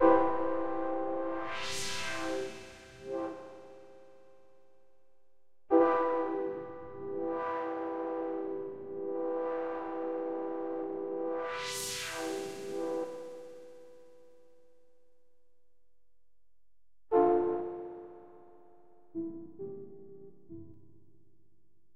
ambienta-soundtrack memorymoon magicair-whawheel live
a mellow piece synthesized with memorymoon, played live (with some touches of modulation wheel) for the 'ambienta' soundtrack.
synth, chill-out, lead, abstract, soundesign, chill, sad, cinematic, synthesizer, relaxing, mellow, chillout, relax, scoring